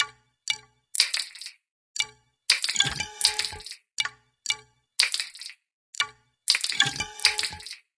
Untitled Song1
this was created of sounds from edwin p manchester's coke bottle pack.I used hydrogen drum machine and processed with sound sound forge